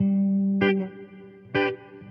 electric guitar certainly not the best sample, by can save your life.
electric, guitar